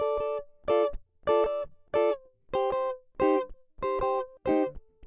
REGGAE GIT 2
REGGAE upstrokes loop